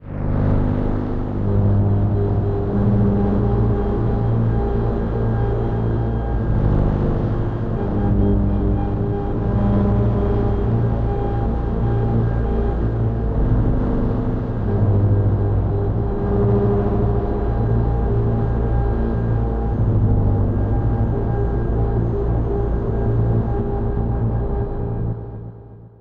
space energy generator
generating energy with alien technology
energy, space